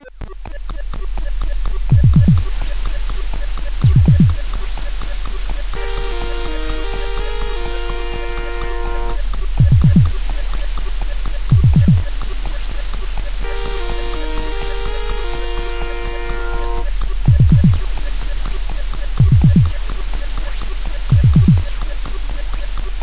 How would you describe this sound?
A simple tune which is different but catchy.
This was created from scratch by myself using psycle software and a big thanks to their team.
ambient, bass, beat, Bling-Thing, blippy, bounce, club, dance, drum, drum-bass, dub, dub-step, effect, electro, electronic, experimental, game, game-tune, gaming, glitch-hop, hypo, intro, loop, loopmusic, rave, synth, techno, trance, waawaa